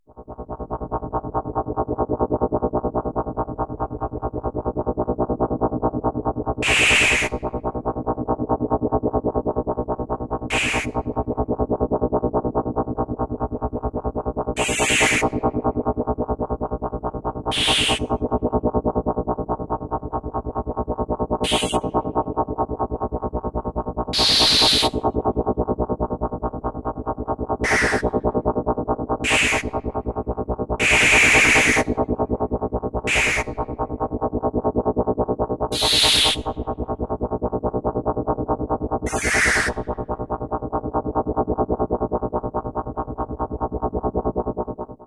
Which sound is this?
Machinery BL
Various artificially created machine or machinery sounds.
Made on Knoppix Linux with amSynth, Sine generator, Ladspa and LV2 filters. A Virtual keyboard also used for achieving different tones.
Factory, Machine, Machinery, Mechanical, SyntheticAmbience